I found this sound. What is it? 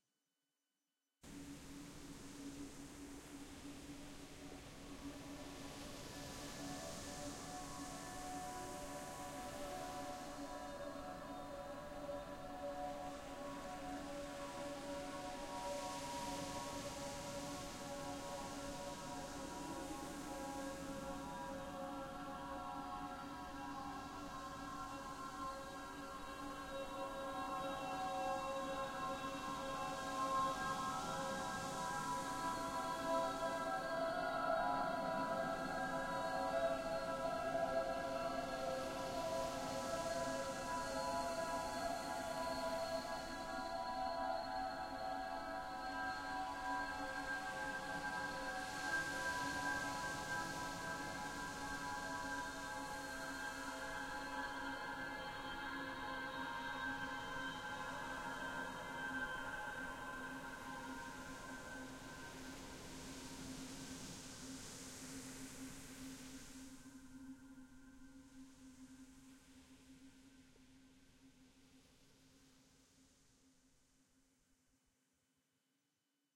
The Begining has End
For an example, this atmosphere could be used in maintaining doom, gloom, or even the transition to something safer in a sci fi movie.